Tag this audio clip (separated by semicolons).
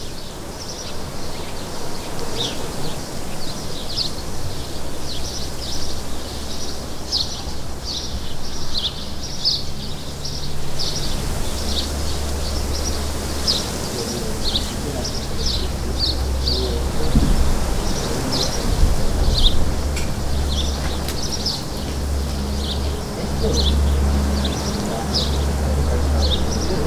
ambiance; ambient; bird; countryside; field-recording; forest; nature; village